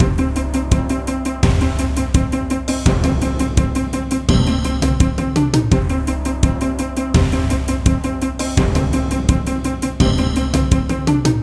Epoch of War is a war theme looping sound with triumphant and cinematic feel to it. There are a few variations, available as Epoch of War 1, Epoch of War 2, and so on, each with increasing intensity and feel to it.
I hope you enjoy this and find it useful.